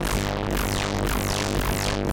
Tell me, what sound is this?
sherman cable90
I did some jamming with my Sherman Filterbank 2 an a loose cable, witch i touched. It gave a very special bass sound, sometimes sweeps, percussive and very strange plops an plucks...
cable, phat, ac, filterbank, sherman, noise, analog, touch, filter, fat, analouge, current, electro, dc